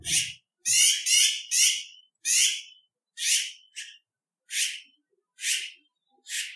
The Colorado Magpie is a cantankerous SOB. They'll steal your food, steal your nest, and they're not shy to tell you about it!